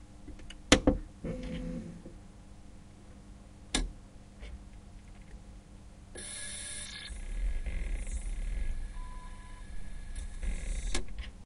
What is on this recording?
Reading disc
My CD player reading a disc.